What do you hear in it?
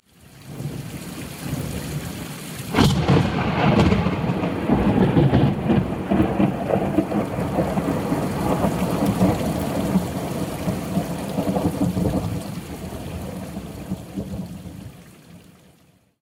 Loud Thunderclap
A loud & sharp pow followed by rumbling rolling thunder. I love these stormy days in Alabama.
field-recording, lightning, nature, rain, roll-of-thunder, storm, thunder, Thunderclap, thunder-crack, Thunder-roll, thunder-storm, thunderstorm, weather